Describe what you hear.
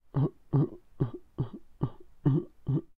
38-Imitación del mono
animation, foley, office